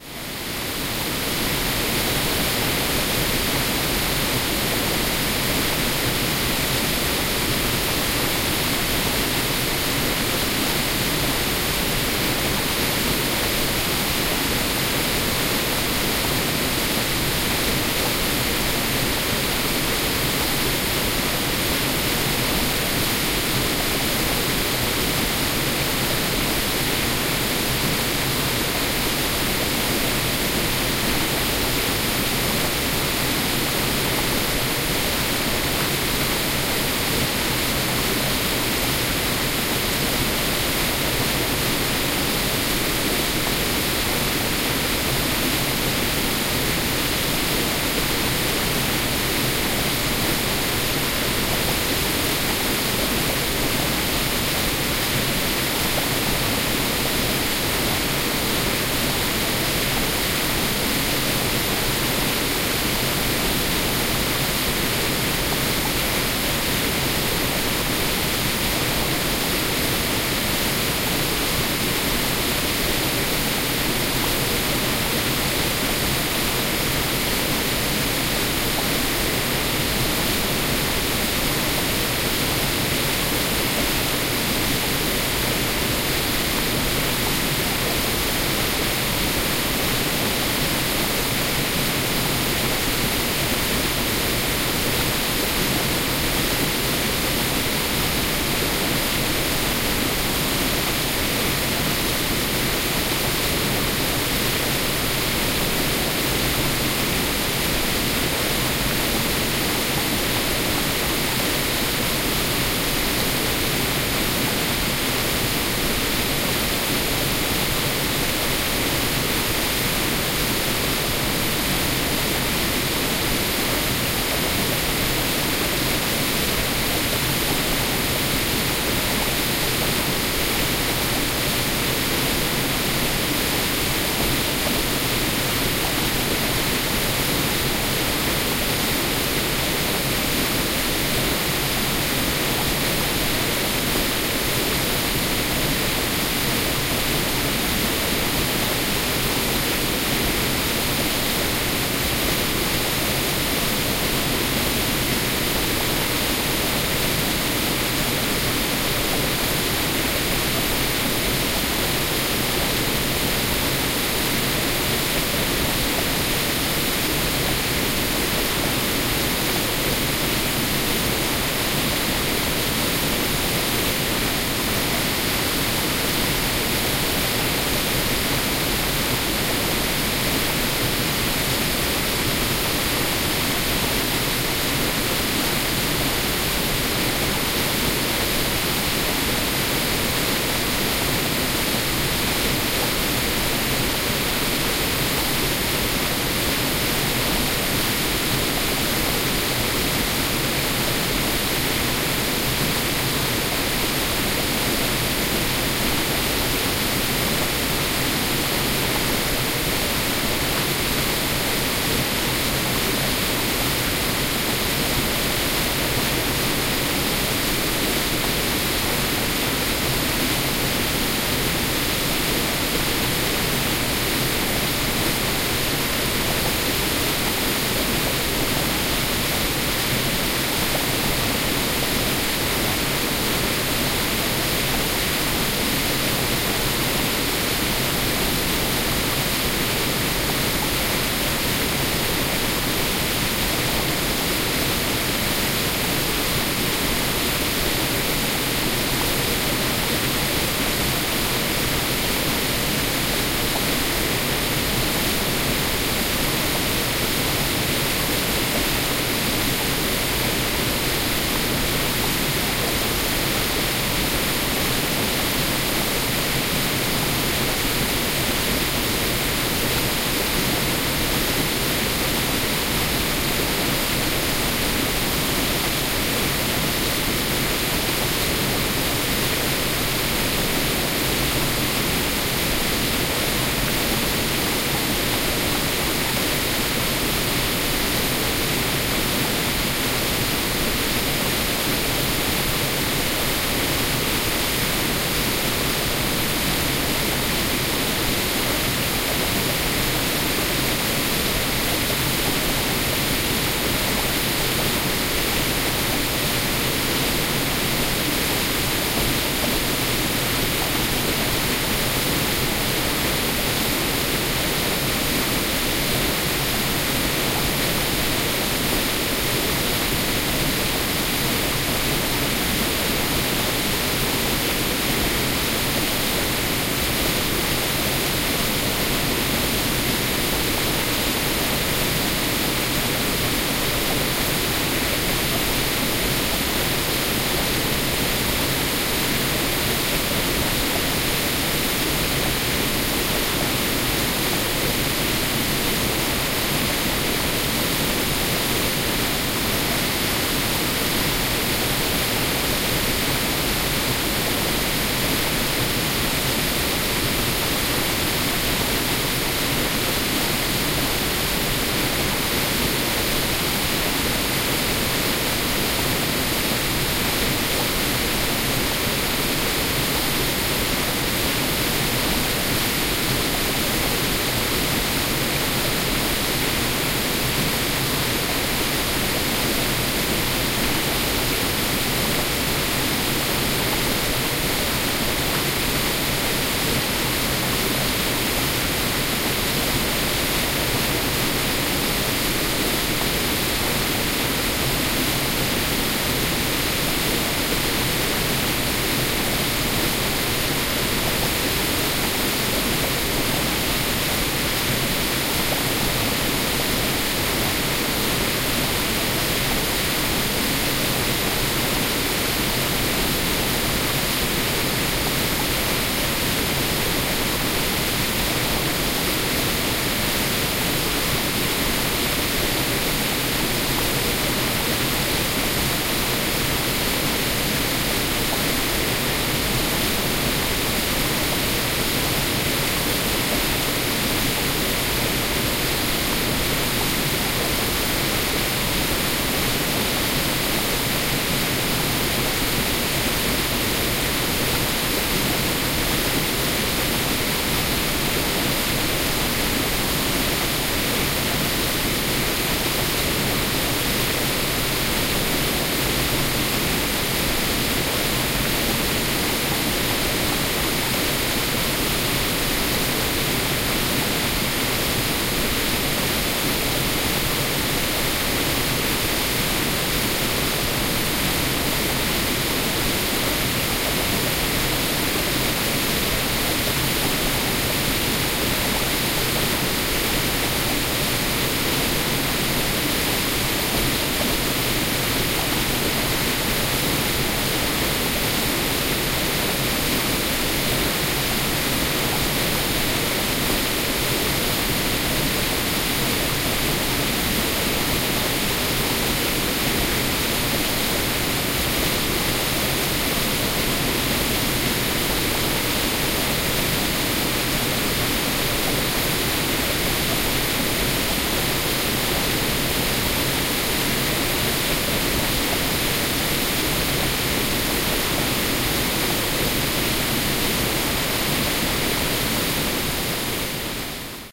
Remix: A steady waterfall. It's ready to put in your MP3 player on repeat, for blocking out noise and helping you sleep. I started with my own sample 44301, which was originally derived from a nice recording by inchadney, and then edited it for this application.
insomnia, mask-noise, meditation, meditative, nature, noise, relaxation, relaxing, ringing-in-ears, sleep-inducement, soothing, tinnitus, tinnitus-management, water, waterfall, white-noise